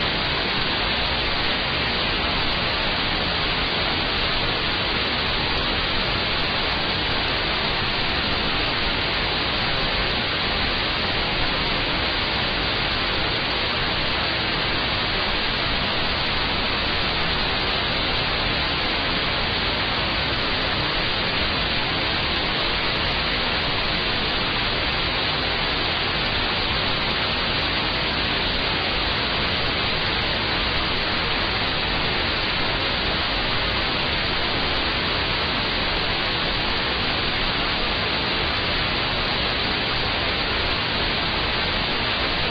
A simple white noise effect I created using Audacity. Added some minor effects to give a different sound.